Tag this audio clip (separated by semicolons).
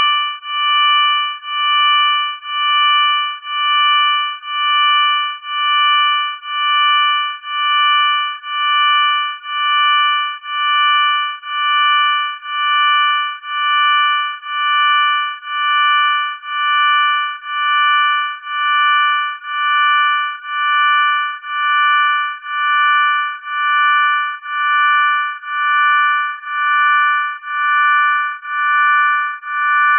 multisample; pad; synth; organ